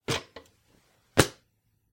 Footsteps-Step Ladder-Metal-08-Up
This is the sound of someone walking up on a metal step ladder.
step-ladder, metal, walkway, Step, Run, Footstep, Walk